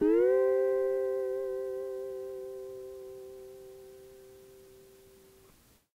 Tape Slide Guitar 8
Lo-fi tape samples at your disposal.
guitar, lofi, slide, tape, collab-2, mojomills, lo-fi, Jordan-Mills, vintage